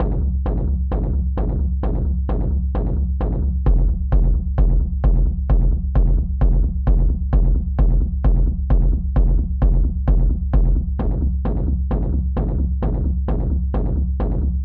kick loop made in ableton
bass, kick, loop, low, sub